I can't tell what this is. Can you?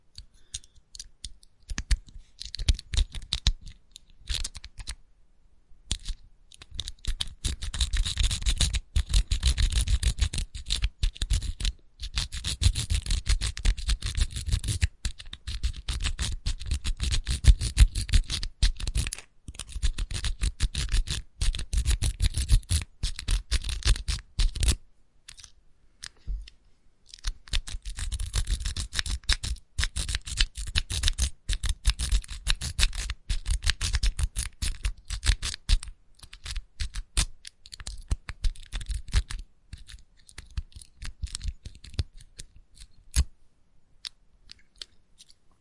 Lock being raked open